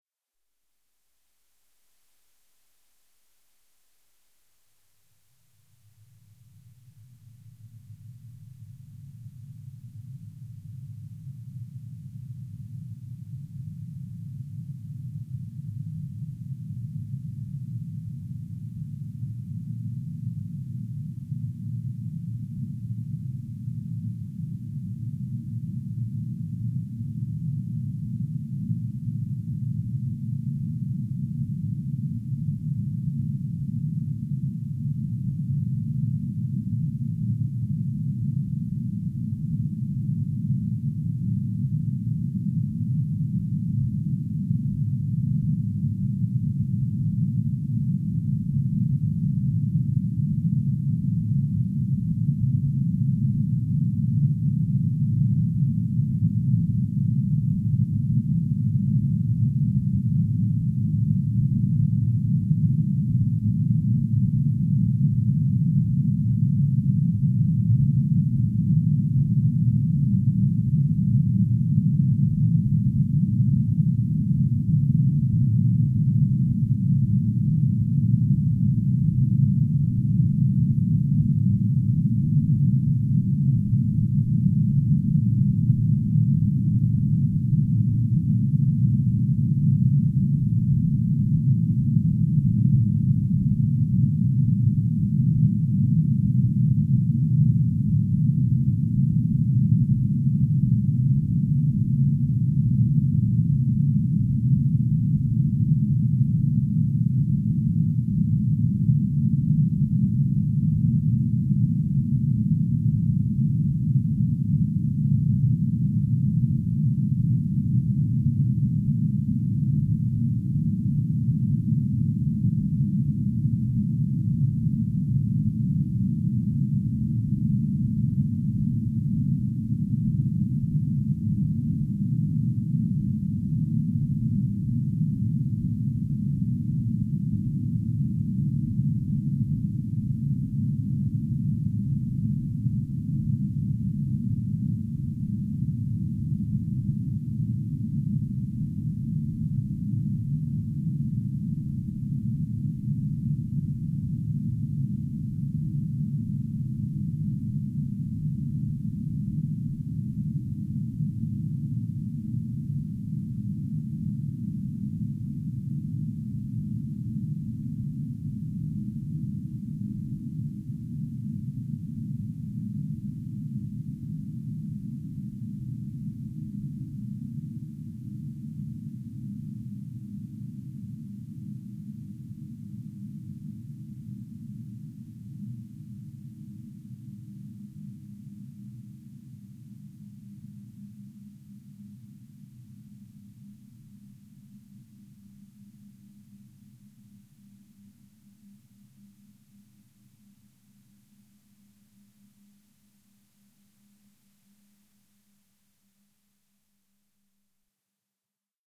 LAYERS 017 - MOTORCYCLE DOOM-01
LAYERS 017 - MOTORCYCLE DOOM is a multisample package, this time not containing every single sound of the keyboard, but only the C-keys and the highest one. I only added those sounds because there is very little variation between the sounds if I would upload every key. The process of creating this sound was quite complicated. I tool 3 self made motorcycle recordings (one of 60 seconds, one of 30 seconds and the final one of 26 seconds), spread them across every possible key within NI Kontakt 4 using Tone Machine 2 with a different speed settings: the 1 minute recording got a 50% speed setting, while the other 2 received a 25% setting. I mixed the 3 layers with equal volume and then added 3 convolution reverbs in sequence, each time with the original motorcycle recordings as convolution source. The result is a low frequency drone like sound which builds up slowly and fades away in a subtle slow way. I used this multisample as base for LAYERS 017 - MOTORCYCLE DOOM 2
drone, low-frequency, menacing, multisample